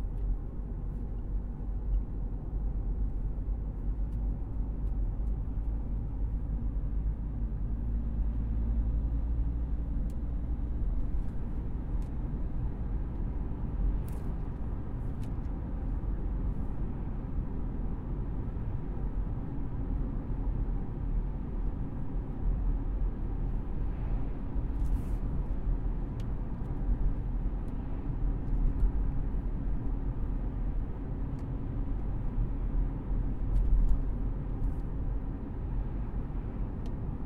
Driving in car
Audio of driving (at around 40mph), taken from inside car.
automobile, car, drive, driving, vehicle